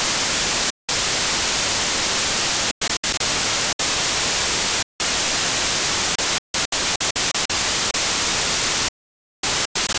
Generated white noise done in Audacity. Few muted sections simulate breakup.